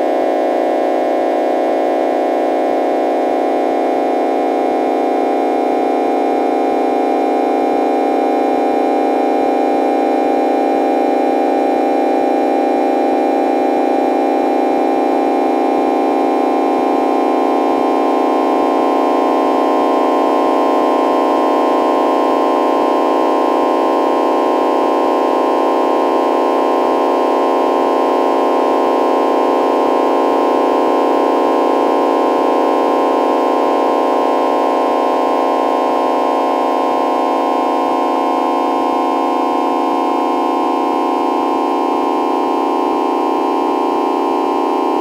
IMG 4348 1kl
the sample is created out of an image from a place in vienna
image, processed, synthesized, Thalamus-Lab